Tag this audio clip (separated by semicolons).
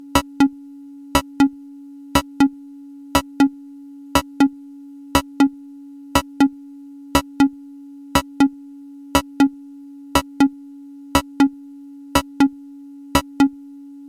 Modular; Mungo; Synth; W0